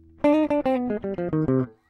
guitar melody 3
Improvised samples from home session..